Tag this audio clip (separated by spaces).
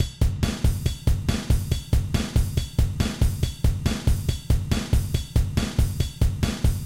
rock ride